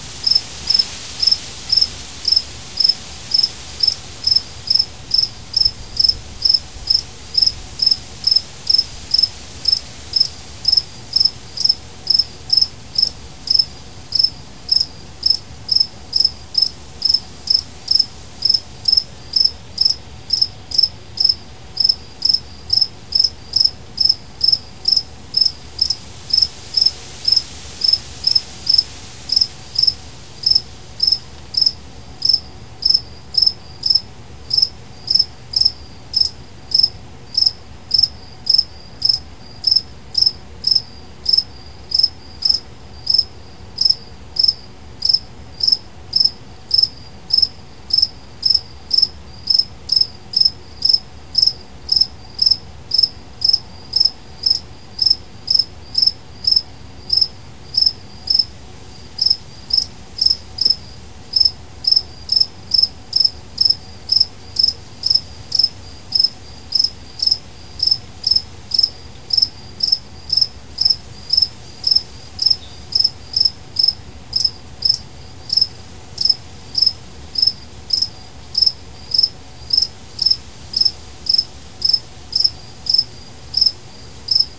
JunePM CricketAndAspens
A real stereo recording of a cricket chirping behind my house, with some noise of aspen leaves in the wind, distant air conditioners, etc. I just used a Zoom H4n with its built-in mics (no special mics, mic-pre's, or converters). While not a *great* recording, as such, it is fine for studying a rather odd cricket chirp that is occasionally clicky or raspy sounding. It could also be looped for ambient background, but the single soloist cricket might not fit that purpose very well. I suggest it is best for anyone just curious about cricket noises, but people always come up with lots of other uses, so you decide.
field, long, night